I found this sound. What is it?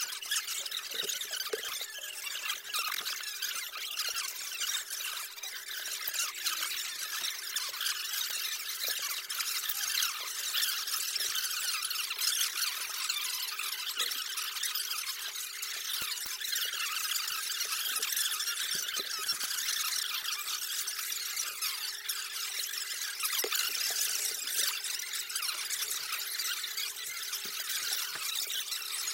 Little wonderfull but mysterious creatures perhaps a futuristic chickenfarmer somewhere on pluto?